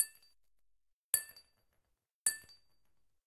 Dropping glass shard 1
Dropping a single glass shard.
Recorded with:
Zoom H4n on 90° XY Stereo setup
Zoom H4n op 120° XY Stereo setup
Octava MK-012 ORTF Stereo setup
The recordings are in this order.